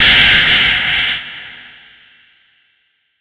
BATTERIE 02 PACK is a series of mainly industrial heavily processed beats and metallic noises created from sounds edited within Native Instruments Batterie 3 within Cubase 5. The name of each file in the package is a description of the sound character.